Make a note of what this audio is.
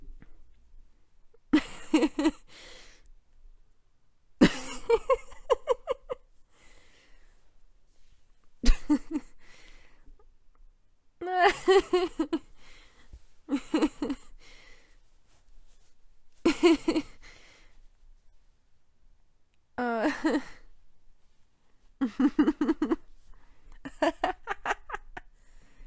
Girl soft laughing
Me laughing softly different ways.
tips are always appreciated.